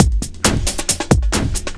Breakbeat at about 135 bpm.